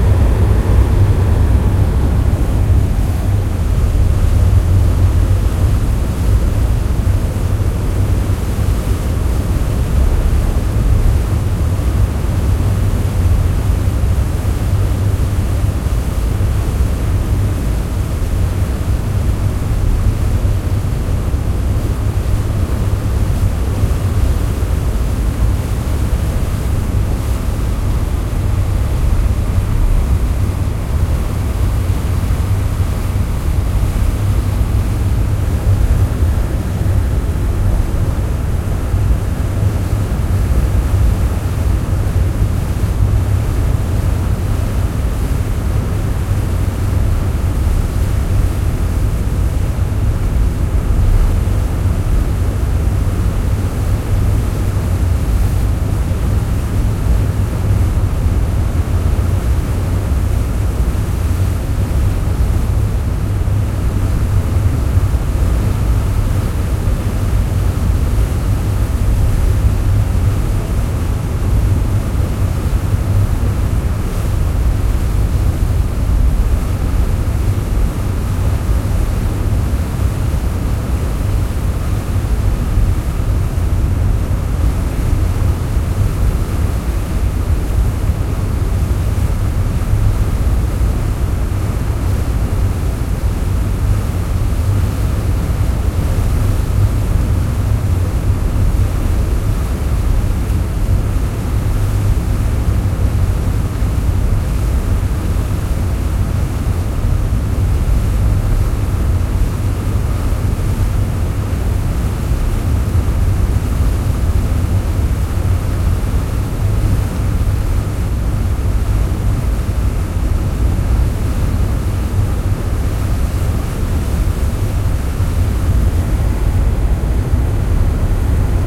Recording from the back of a ferry boat. Sound of the water being churned up by the engine and forming a large wake behind the ferry. Sounds like splashing water.